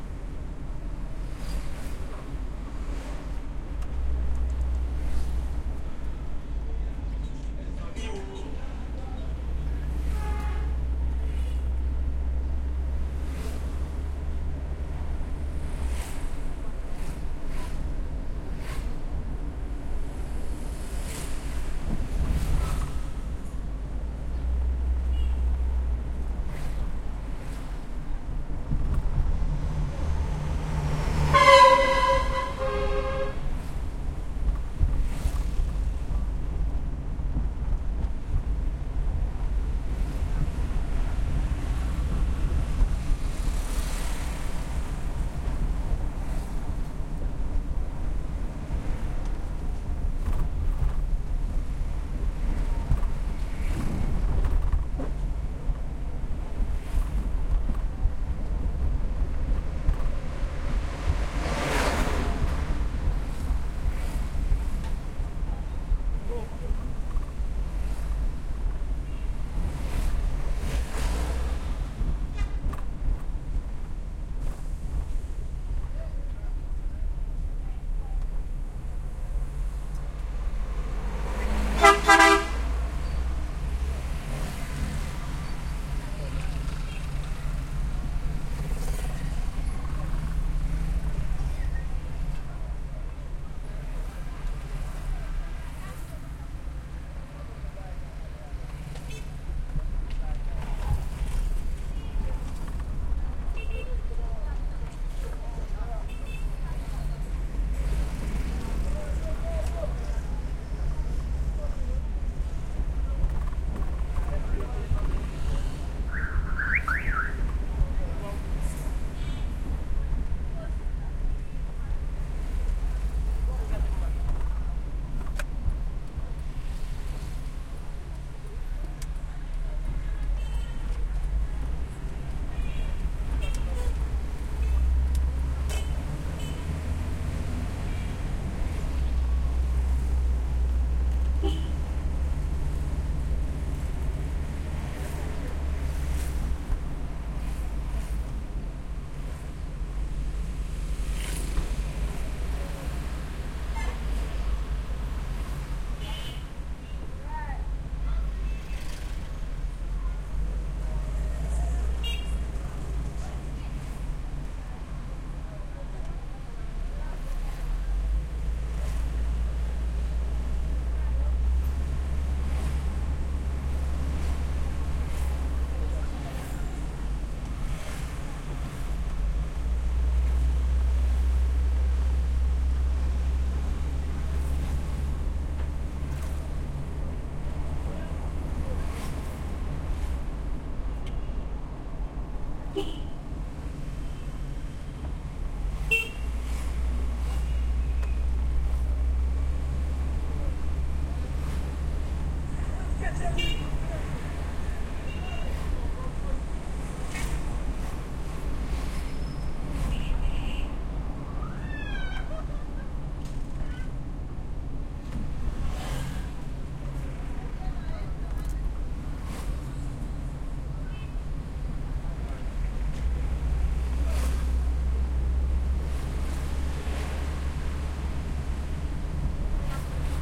auto int driving Haiti slow speed windows open street sounds cars pass by honks
windows, open, driving, speed, auto, Haiti